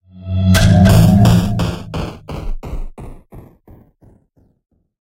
Syserr1v2-in thy face
System or Application Message or Notification
computer, error, file, intense, medium, rolling, wrong